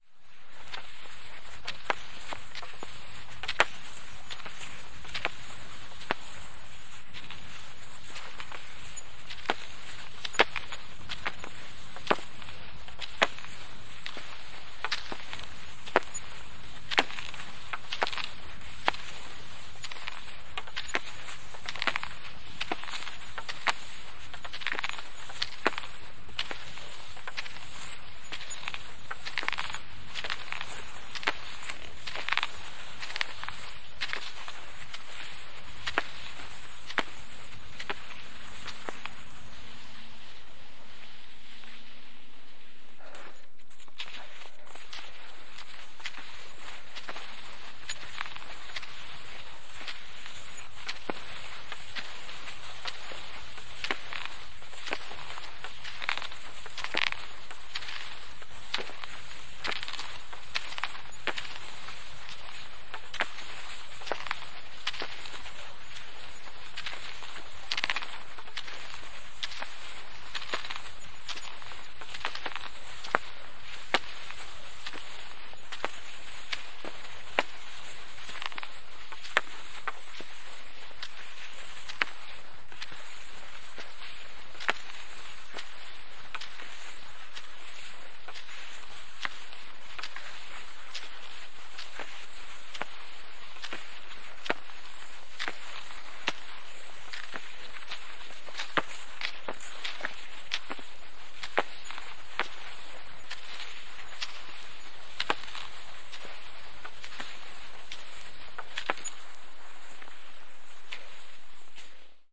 Perinteistä hiihtoa lasikuitusuksilla ladulla vaihtelevassa maastossa.
Paikka/Place: Suomi / Finland / Vihti, Jokikunta
Aika/Date: 24.02.1993